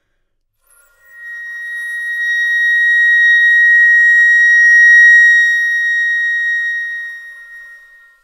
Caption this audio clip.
Flute - Asharp5 - bad-dynamics
Part of the Good-sounds dataset of monophonic instrumental sounds.
instrument::flute
note::Asharp
octave::5
midi note::70
good-sounds-id::226
Intentionally played as an example of bad-dynamics
Asharp5, flute, good-sounds, multisample, neumann-U87, single-note